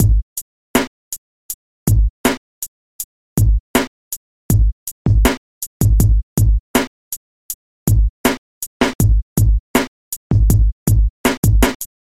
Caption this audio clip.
Drumloop Pintura Abstracta - 4 bar - 80 BPM (no swing)
abstract, beat, drum, drum-loop, drums, loop, rhythm